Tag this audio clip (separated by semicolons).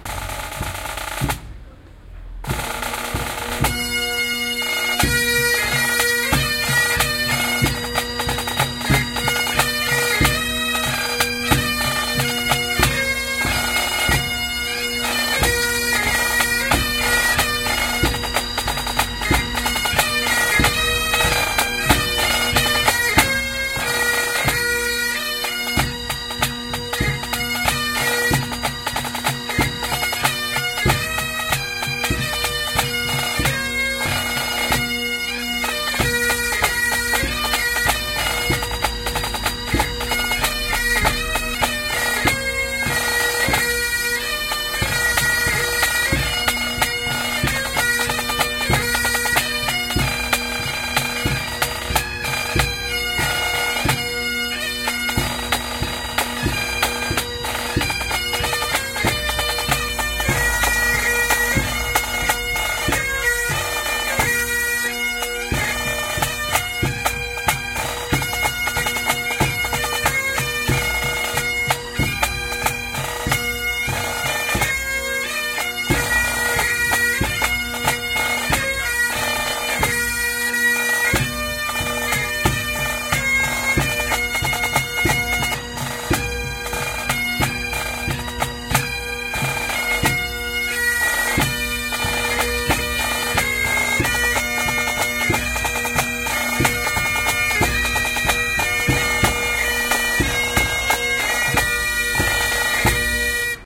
bagpipes; pipeband; binaural; music; scotland; fiel-recording